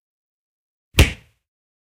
Cartoon Punch 05
cartoon, cartoon-sound, punch